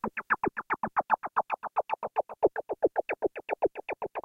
Created with a miniKorg for the Dutch Holly song Outlaw (Makin' the Scene)
psychedelic, arpeggiator, arp, chirp, synthesizer